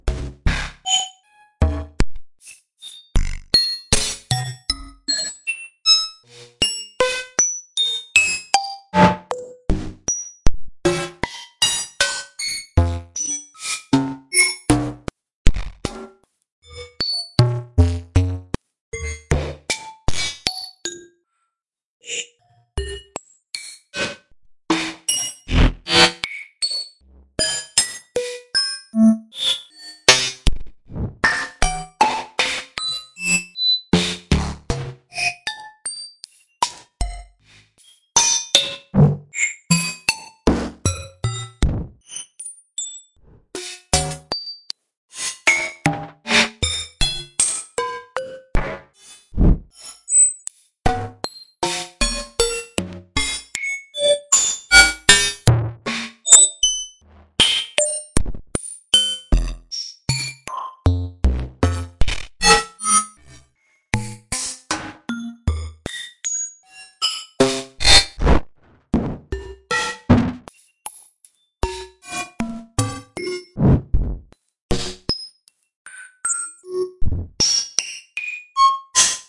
VCV Rack patch
synthesizer, digital, modular, synth, modal